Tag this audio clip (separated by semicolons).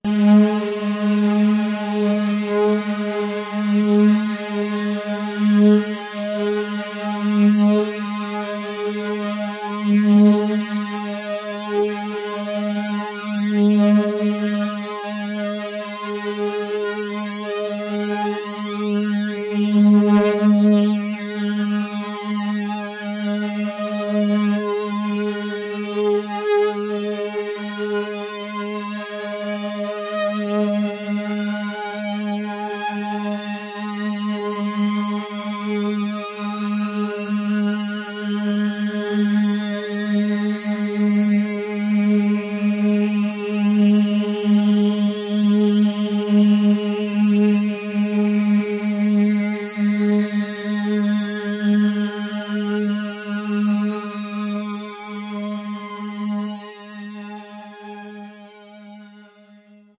electronic; waldorf; filtered; multi-sample; saw; synth